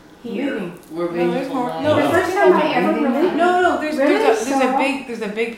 bolivar chat01
a small snippet of lots of people talking at the same time in the beach house at bolivar
female, human, male, man, speech, talk, talking, vocal, voice, woman